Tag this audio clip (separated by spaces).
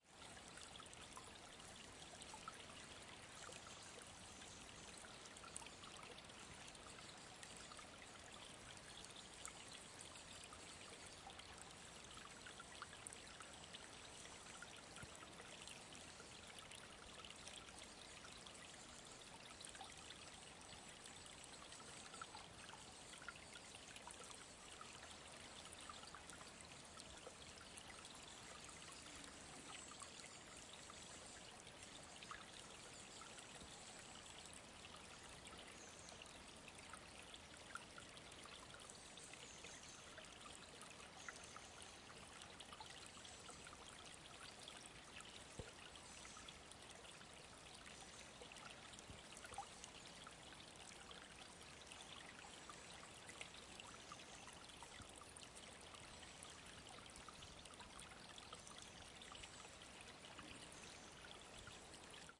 field-recording Forest Stream